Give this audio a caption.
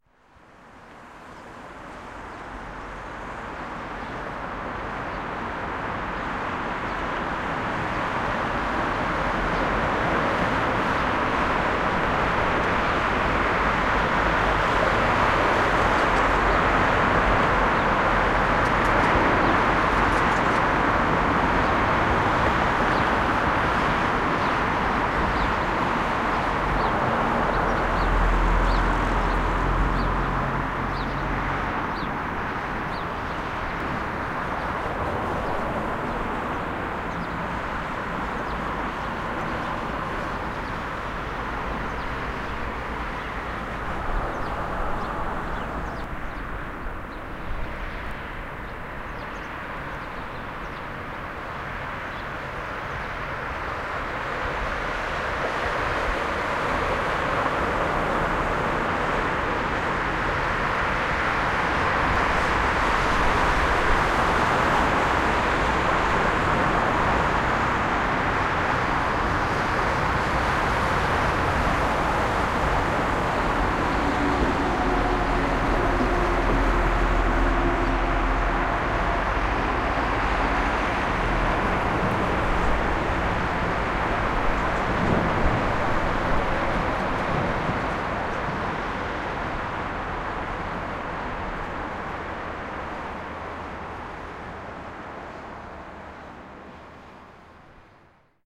Traffic in the morning in Berlin. Prenzlauer Promenade
town, urban